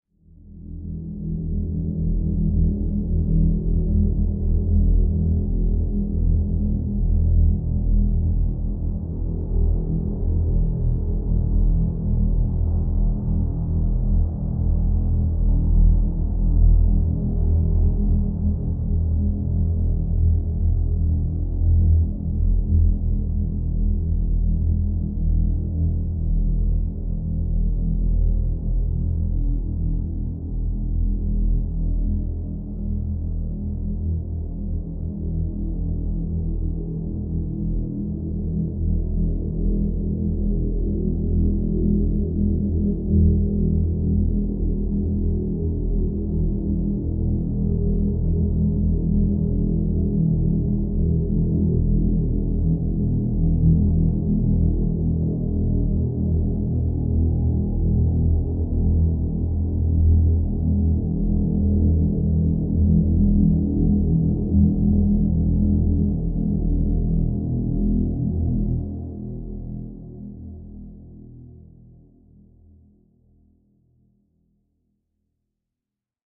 Score Drone
Film dark scary underscore
Horror, Atmosphere, Ambient, Experimental, Incidental, Drone